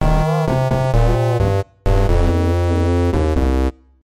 Game Over
A small musical theme meant to convey when a player loses all their lives in a video game.